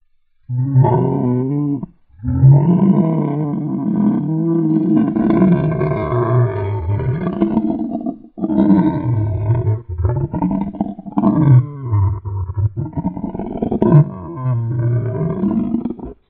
Dragon Inc. Scream 3/14
Scary, Dragon, Scream, Voice